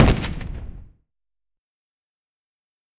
Explosive 1 v2 [DOD 130303]
artillery, explosion, shot, destruction, bang, tank, kaboom, military, explosive, destructive, counter-strike, tnt, gun, damage, guns, weapon, army, attacking